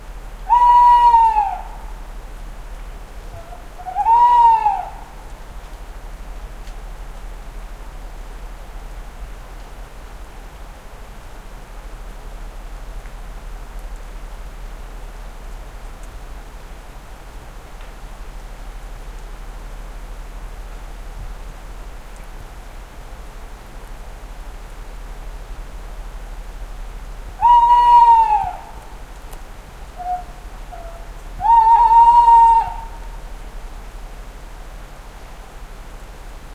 Call of a Tawny owl. Recorded in August 2009 in Perthshire /Scotland, using 2 AT3031 microphones and an Oade Brothers FR-2LE recorder.
field-recording, owl, ringtone, scotland, tawny-owl